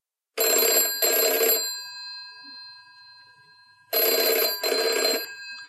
As requested for ring tones. Telephone bell from a circa 1965 British Telecom 706 model phone.
telephone, uk